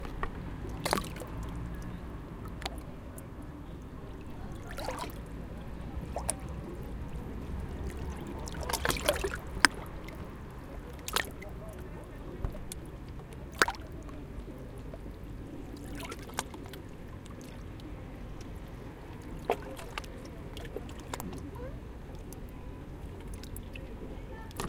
lapping-clapotis STE-035
sound of sea water, lapping on the shore
lapping, sea, shore, water